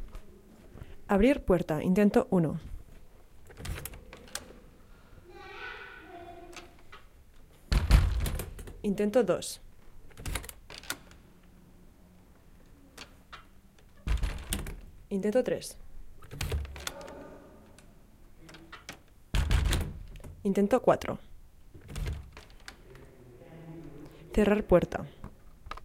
This is me opening an old wooden door. High quality.